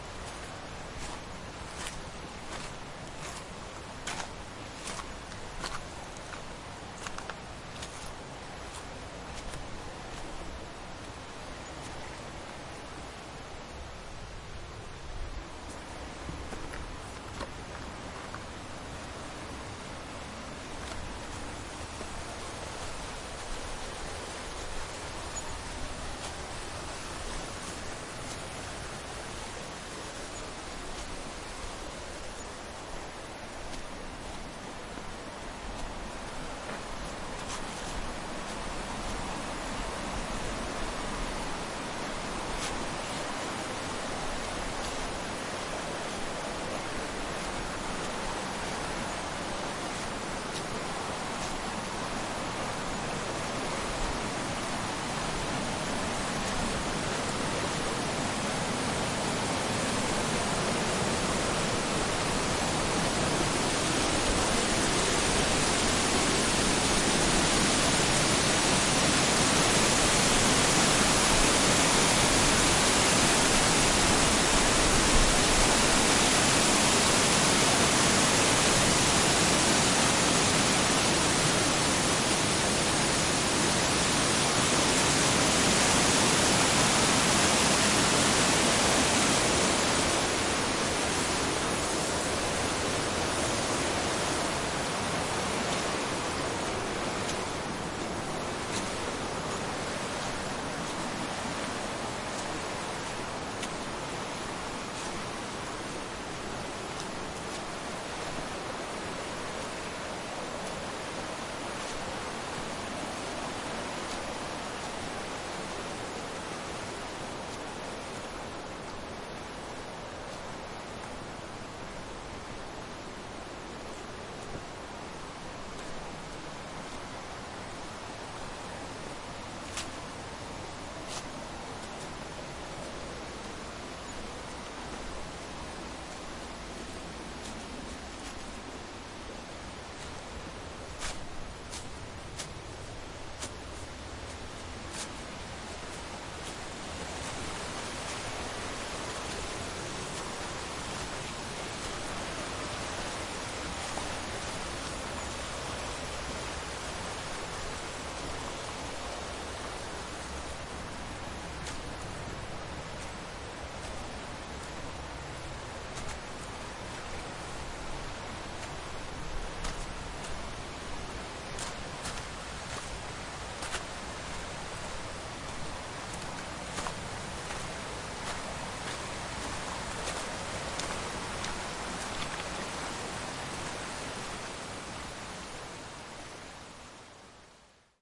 Riverside walking past waterfalls
Walking on rocky/shingle ground besides a small rushing river climaxing at a waterfall then walking back. Recorded near the Sgwd Yr Eira Waterfall in Wales, UK. Recorded with a Zoom H5 with an MSH-6 stereo mic on a calm winter afternoon.
crashing-water, field-recording, first-person, game-design, nature, pov, rapids, river, riverside, riverside-walk, rushing-water, stream, uk, Wales, walk, walking, water, waterfall